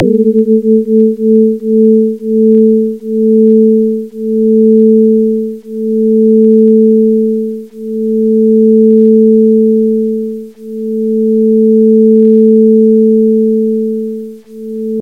(Sin[440*Pi*t] + Sin[880*Pi*t + 0.1])*Cos[10*Log[t]] for t=0 to 15